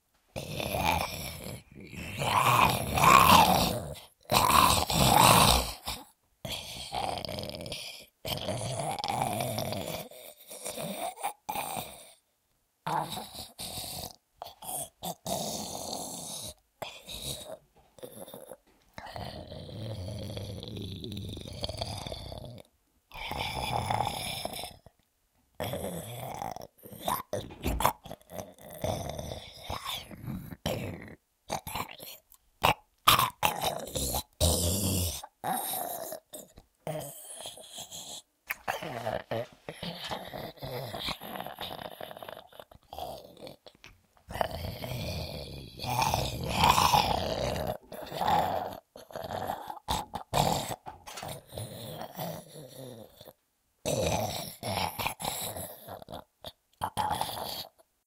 A creepy zombie voice for all your post apocalyptic creations!
Also sounds a bit like Gollum at times ;)
recorded with a Røde NTG-1 mic and a Tascam DR40